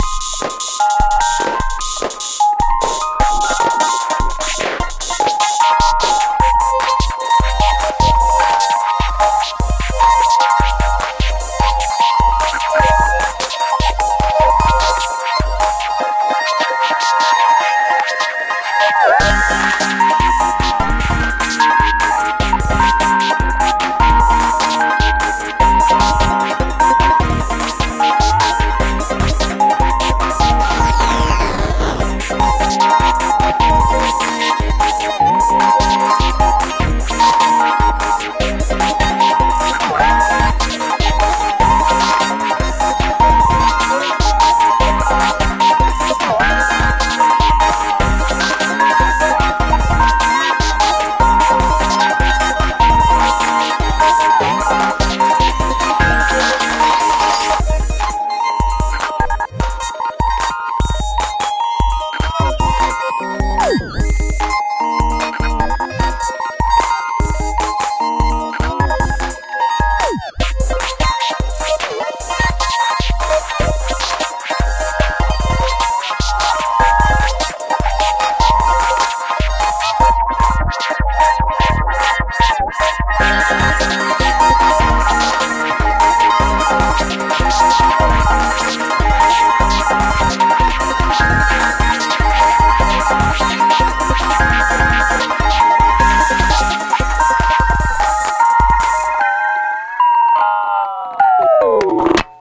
8bit 8 bit synth experimental beat glitch